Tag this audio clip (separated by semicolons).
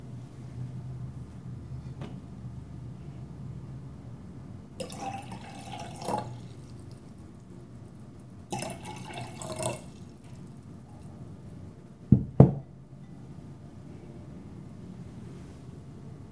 foley; glasses; mono; pour; pouring